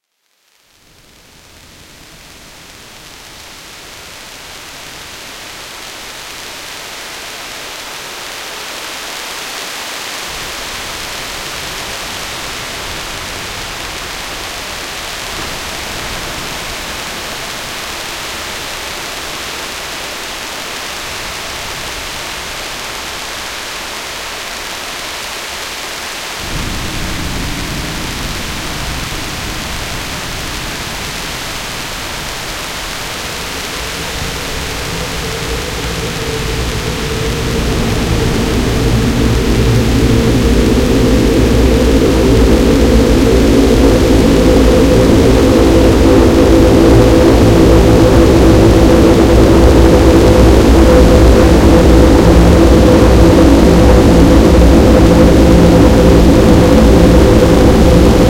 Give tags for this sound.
lovecraft; rain; fx

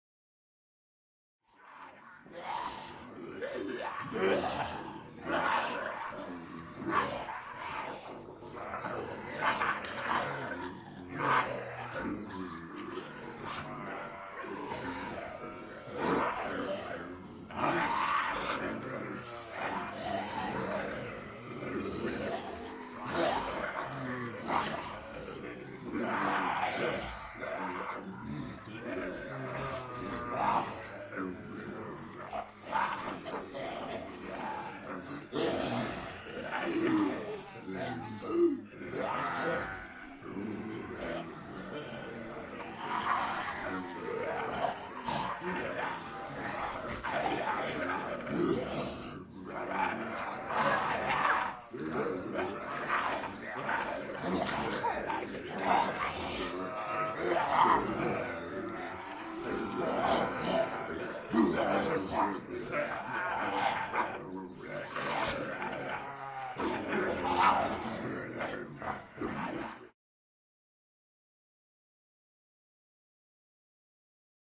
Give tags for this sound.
horror; dead-season

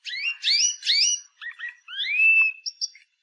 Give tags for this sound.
bird; chirp

